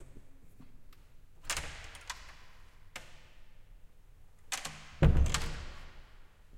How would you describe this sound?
Opening and closing door